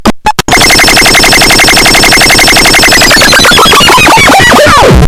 Gabe Leadon
Yeah a lead.. you trance bitches!
coleco, experimental, rythmic-distortion, core, just-plain-mental, bending, murderbreak, glitch, circuit-bent